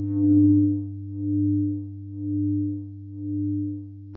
modified dtmf tones, great for building new background or lead sounds in idm, glitch or electronica.